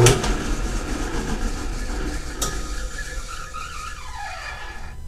mono field recording made using a homemade mic
in a machine shop, sounds like filename--drill press on and off--nice drone